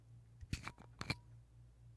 Cap Turn Off Bottle FF275
2 quick turns of bottle cap to remove it. Low tones. Sliding. No pop.
bottle-open, cap-removal, cap-unscrew